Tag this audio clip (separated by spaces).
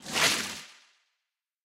water Whoosh swish